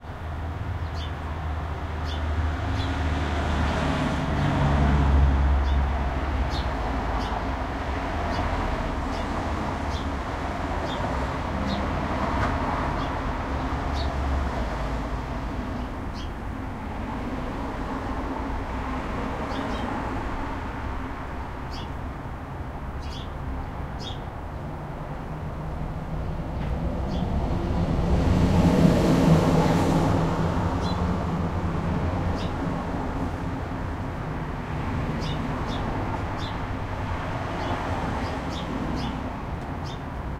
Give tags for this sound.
ambience; cars; city; field-recording; noise; street; town; traffic

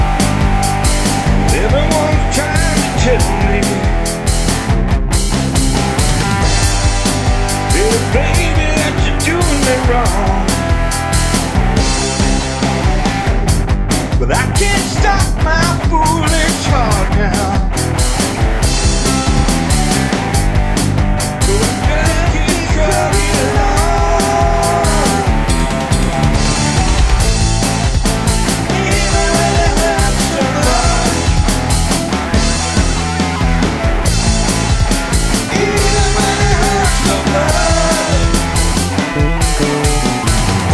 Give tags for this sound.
140; audio; bass; BPM; drums; guitar; loop; rock; traxis; vocal

